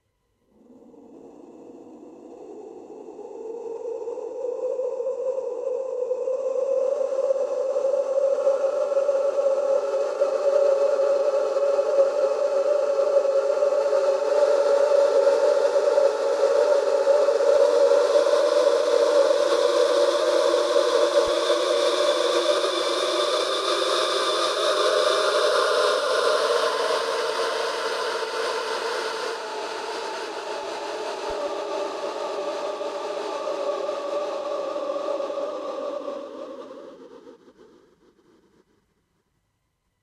Just some examples of processed breaths form pack "whispers, breath, wind". This is processed sample of a compilation of granular timestretched versions of the breath_solo_samples.